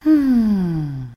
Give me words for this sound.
Essen, lecker, zufrieden
eat delicious yummy mmh
eat, yummy, mmh, happy, satisfied, delicious